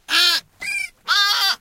Lamb Chop Speed Up
I used a time expansion technique on Audacity to speed down a goat "baw". The sound becomes a high pitched whine as the frequency has been doubled. There are two goats.